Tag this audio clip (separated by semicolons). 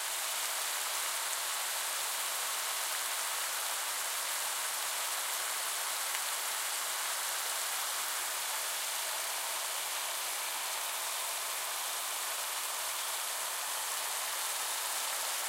hardrain; loop; ambient; Rain